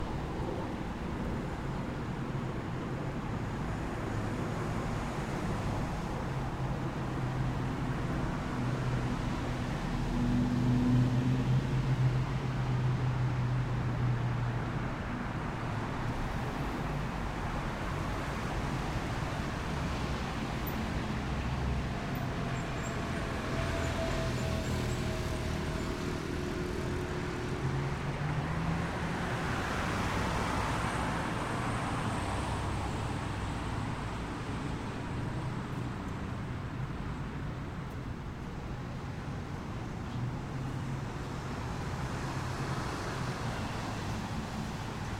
City Street Traffic 01
Evening traffic on a four lane road (University Ave.) in Berkeley, California recorded from the sidewalk. Speed limit is 30 MPH.
Rode M3 > Marantz PMD661.
cars, traffic, city, urban, street, ambience